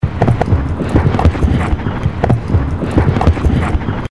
train passing over bridge in montreal (different)
bridge, field-recording, passing, train